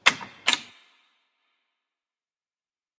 An AK Dust Cover being removed.